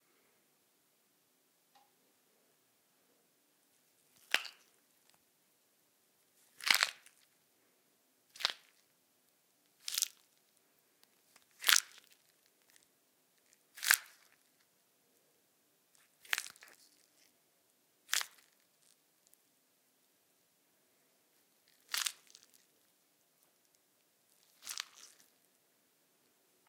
Bones Breaking 1
The sounds of bones breaking.